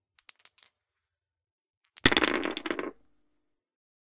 Rolling dices.
{"fr":"Dés 4","desc":"Lancer de dés.","tags":"de des lancer jouer jeu"}
dice, dumping, game, roll, rolling, rolls